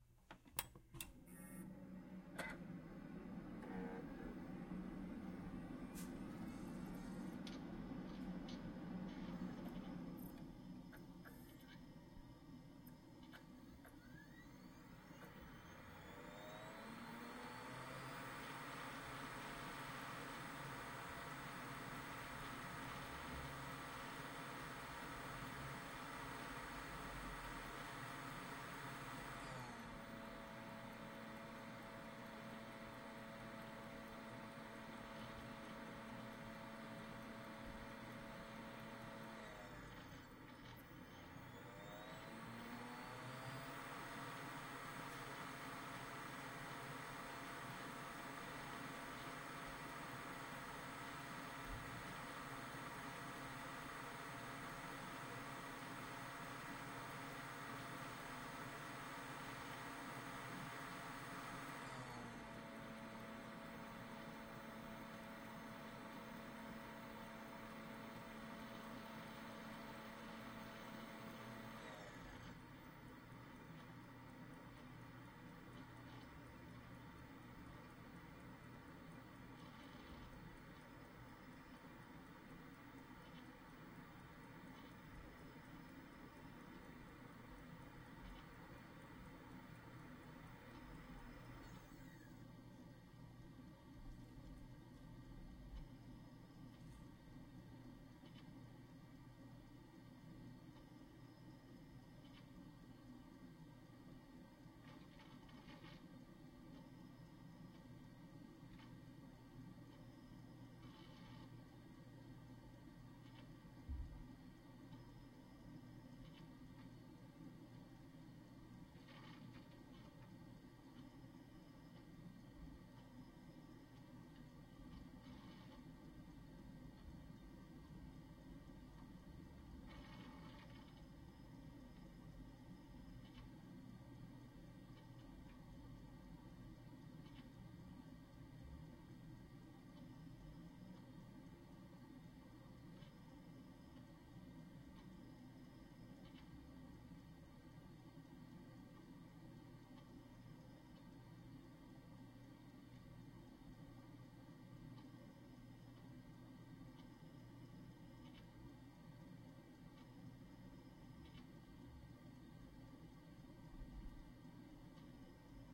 computer PC startup2
computer, PC, startup